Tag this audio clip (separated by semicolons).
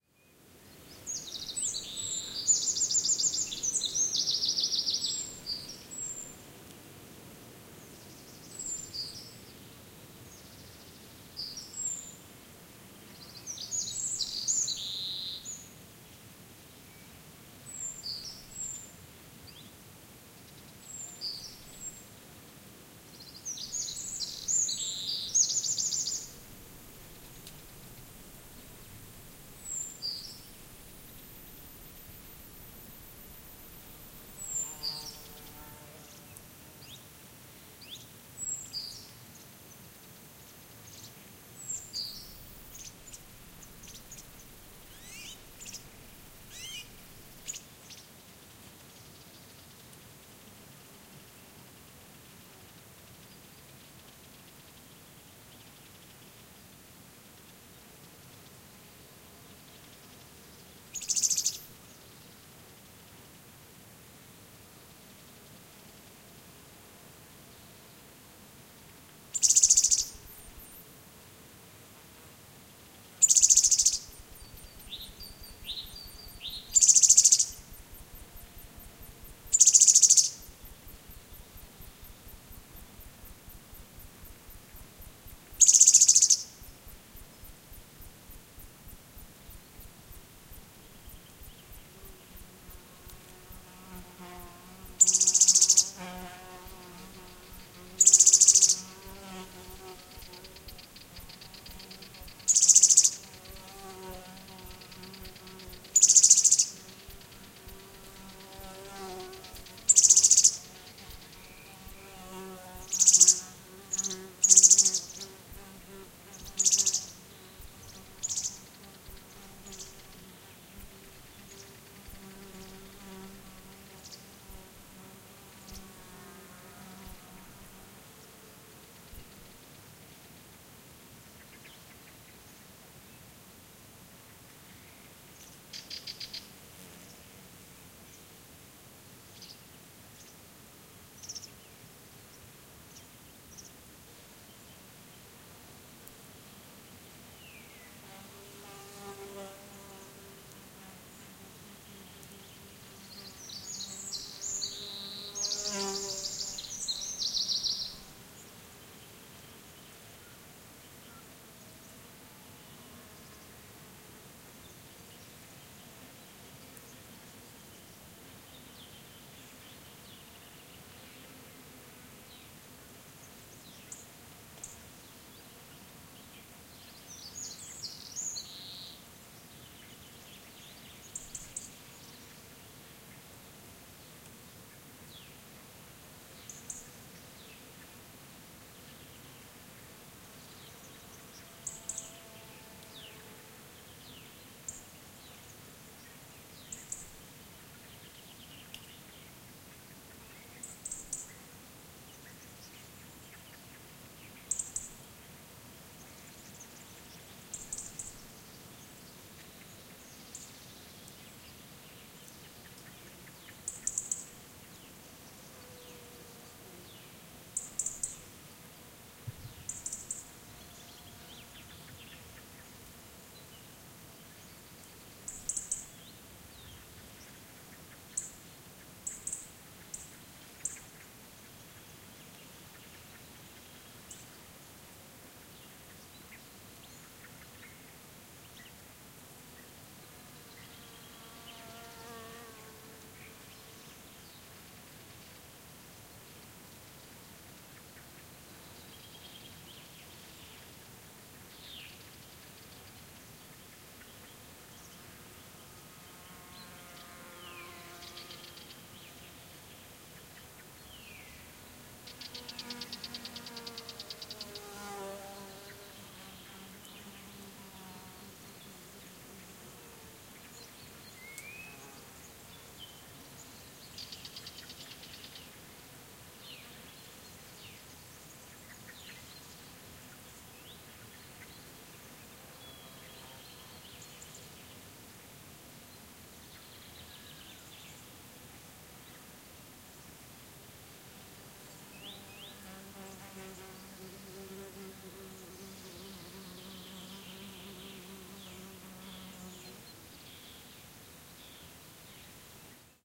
ambiance; birds; donana; field-recording; forest; insects; nature; south-spain; spring